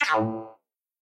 Spacey Ricochet
Ricochet UI sound with spacey vibe